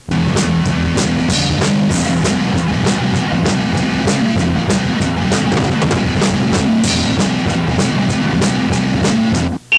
An assortment of strange loopable elements for making weird music. A snippet from the cult classic thrash band "Warfare" from a practice cassette tape circa 1987.